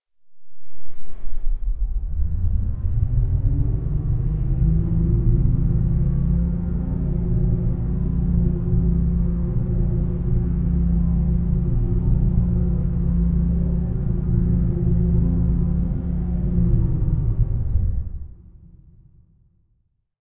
Some background noise kind of like engine noise, which rising from near-DC and silence to a steady running rate, and then falls again to near-DC and silence with reverberation. This is from an Analog Box circuit created for the purpose. This is intended to give you total onset and turn-off as if switching a mechanism on and off. Unlike the others, this one is not a loop, but the steady portion can be replaced with the EngineNoiseSteady sound from this pack (which is a loop), such that if you blend the transitions and repeat the EngineNoiseSteady loop, you can get any desired length. Others built from the same circuit include EngineRoomThetaRelaxer and EngineRoomWaver, but there are also other sounds intended to fill a similar role but with a rather different character in that same Backgrounds pack.
engage, disengage